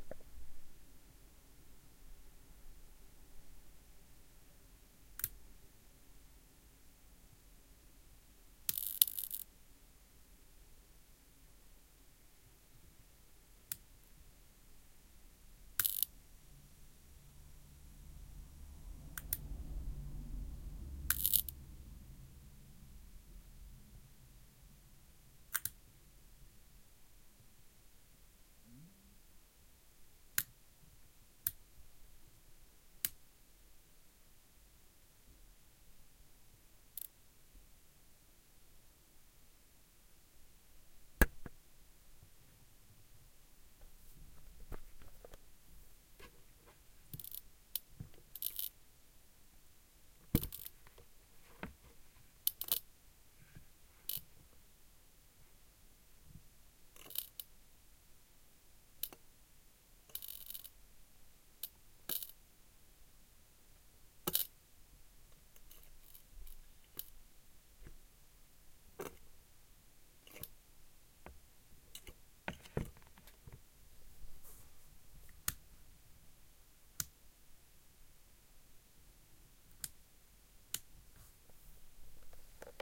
A broken lamp being interfered with